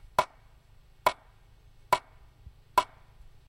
Hitting a mid-size tree trunk with a foot-long, 2" diameter portion of a tree branch. Unprocessed.